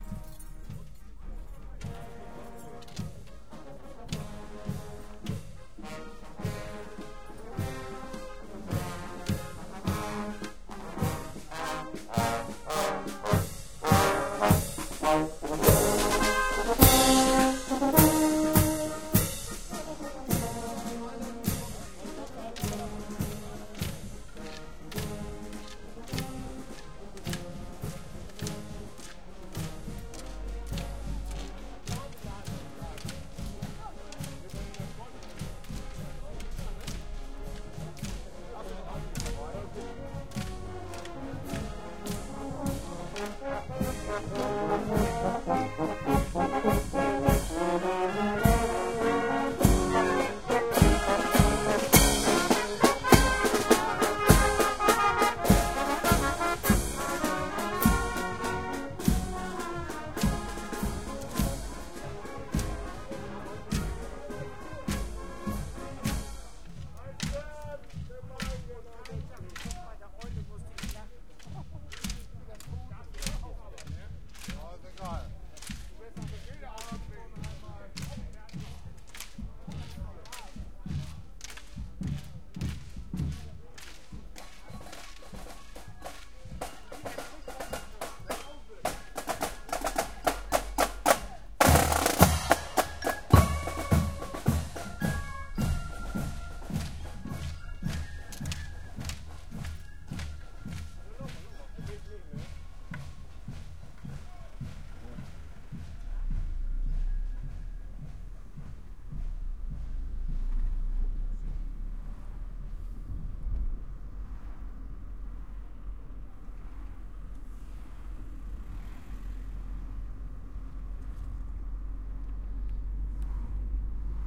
SCHÜTZENFEST MARCHING MUSIC PARADE Tascam DR-05
i wanted to escape the parade of our schützenfest, but suddenly i was in the middle of it...
i was amused of the looks of these guys and how they wondered "what the hell is that grey furry in his hand?" but no-one was hero enough to ask me ;)
well, the recording is a great one! but i guess i will never be that near to a marching parade again.
hopefully somehow useful for some of you!
sch, marching-band, tascam, drum, marching, parade, dr-05, tzenfest